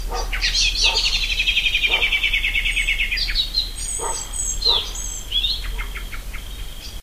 blackbird largedog dusk
blackbird and a large dog barking in the background /mirlo y perro grande ladrando al fondo
south-spain, dog, nature, andalucia, field-recording, birds